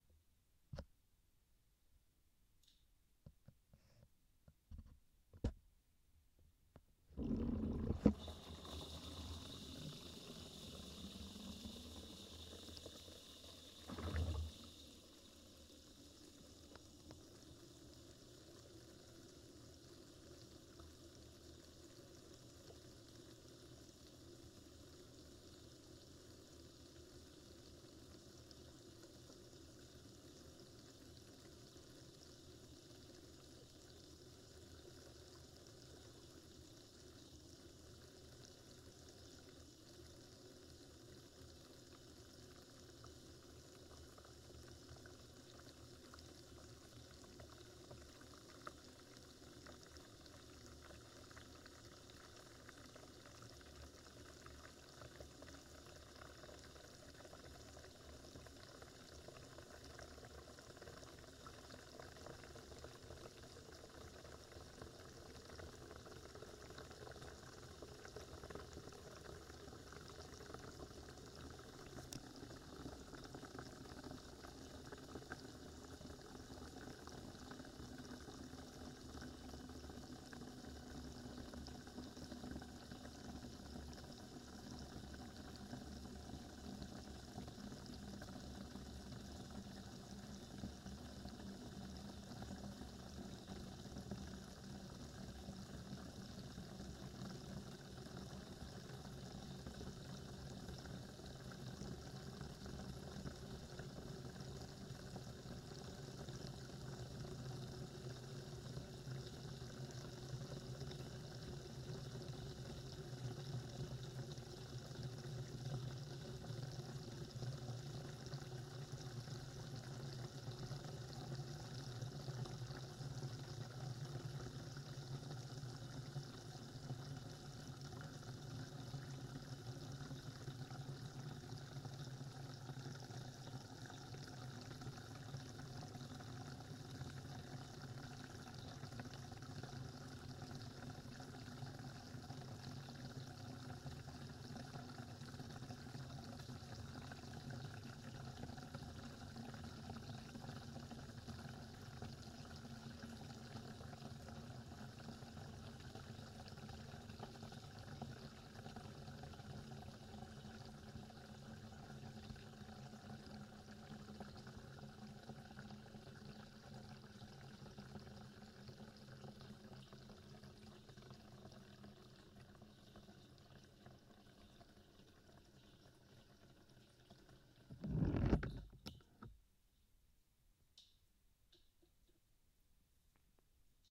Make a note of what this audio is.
Flush refill from different place compared to the other one from my collection. Korg CM300 Mic and H6 recorder.